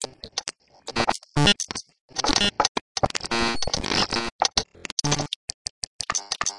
A strange glitch "beat" with lots of clicks and pops and buzzes and bleeps. Created by taking some clicks and pops from the recording of the baby sample pack I posted, sequencing them in Reason, exporting the loop into Argeïphontes Lyre and recording the output of that live using Wire Tap. I then cut out the unusable parts with Spark XL and this is part of the remainder.